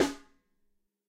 Ludwig Snare Drum Rim Shot